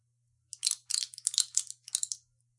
crackling long 1
recording, interactions, player